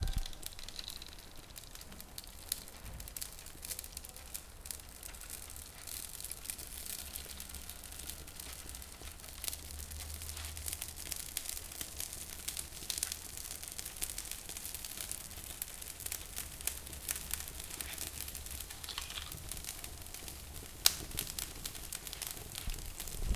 Content warning
Recorded using the Zoom H1N, Record the sound of burning leaves.
No edit.
ambience, ambient, binaural, burning, field-recording, stereo